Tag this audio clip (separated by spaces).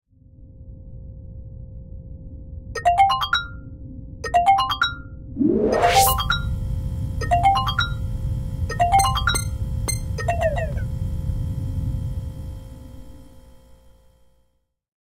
alarm atmosphere music science-fiction sci-fi sleep-pod spaceship synth wake-up-call